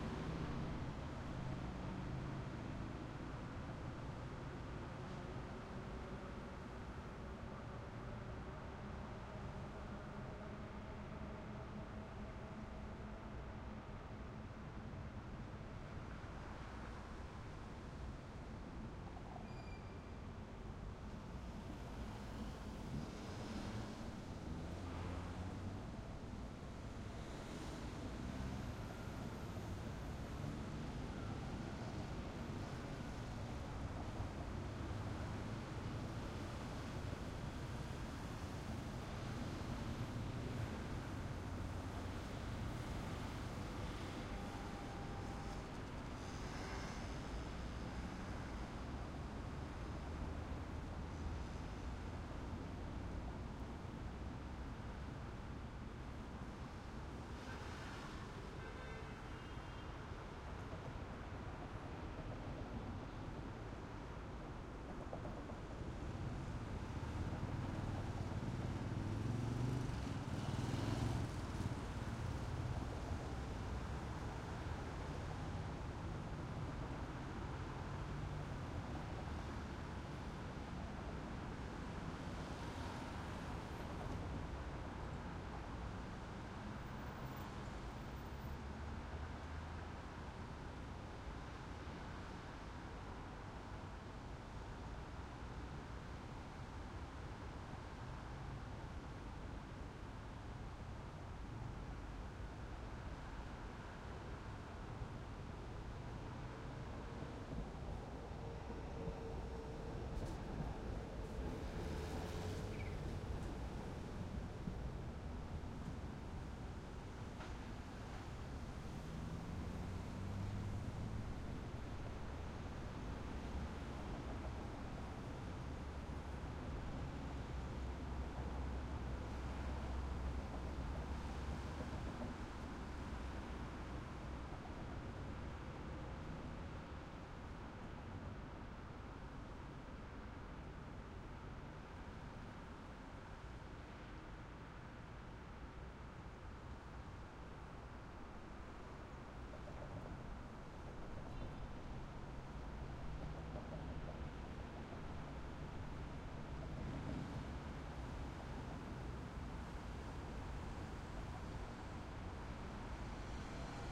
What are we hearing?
090404 02 frankfurt soundscape road traffic
road, frankfurt, soundscape